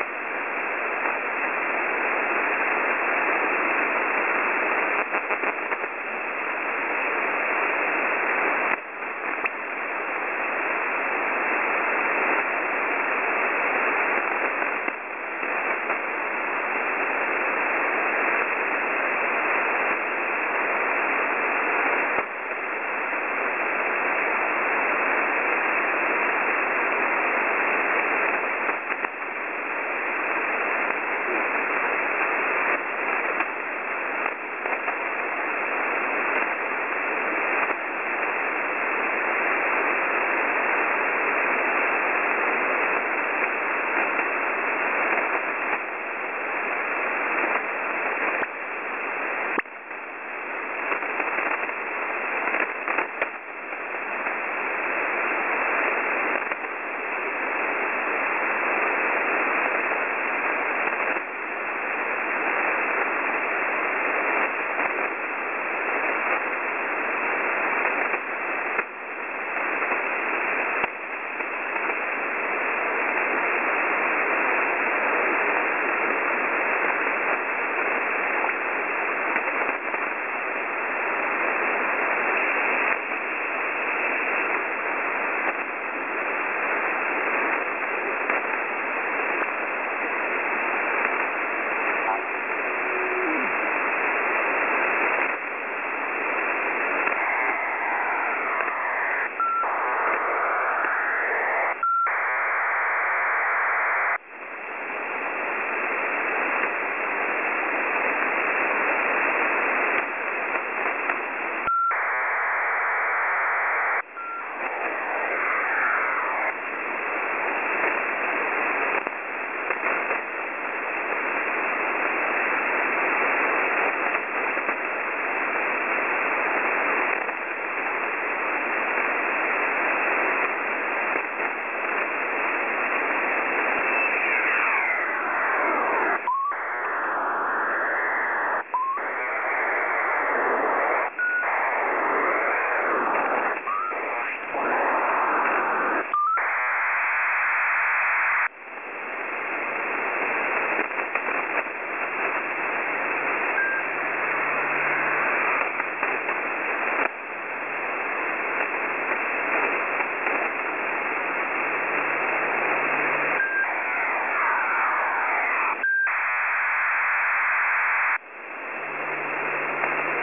Some data 8942khz 20jul2014 0000
Another recording from the Twente university website.
Another data transmission.
Details of the frequency, date and time are on the file name.